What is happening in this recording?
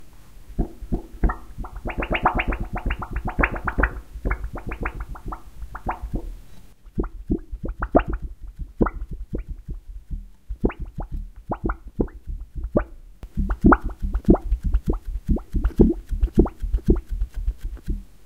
Wobbly Plastic Disk
Wobble sounds made by a DVD.
Recorded with a Zoom H2. Edited with Audacity.
Plaintext:
HTML: